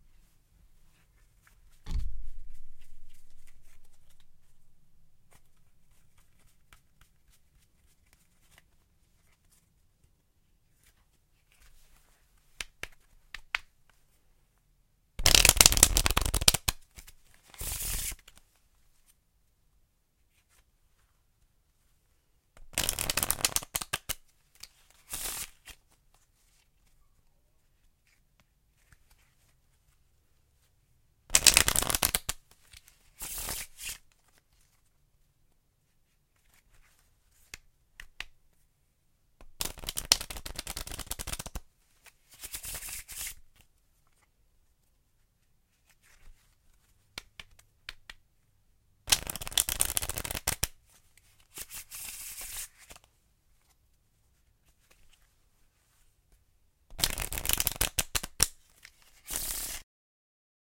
Cards Shuffling
Shuffling cards various times
cards, shuffle, shuffling-cards, shuffling-deck